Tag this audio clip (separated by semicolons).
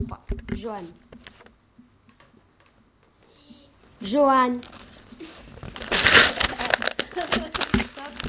messac; France